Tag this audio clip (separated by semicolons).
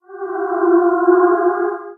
sonification; moan; spectral; ominous; csound